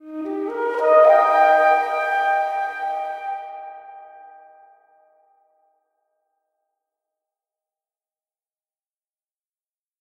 Flute Musical Orgasm
Musical representation of a climax. Thanks to Emily Rose Duea for playing the flute.
climax, flute, musical, orgasm, pleasure, reverb, woodwind